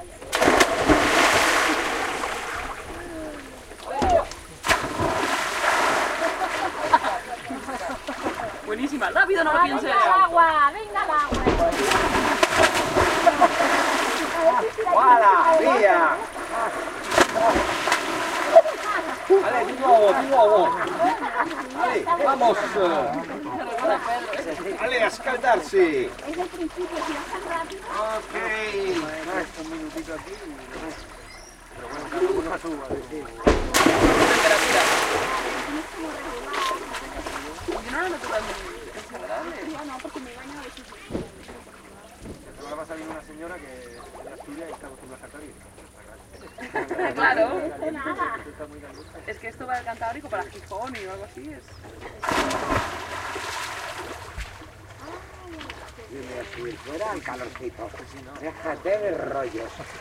people coming out from sauna, jumping into water outside and talking in spanish and italian. Shure WL183 into Fel preamp, Olympus LS10 recorder. Trollvagen, Vardo, Aland Island